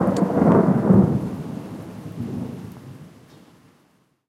Fast and furious, short thunder recording.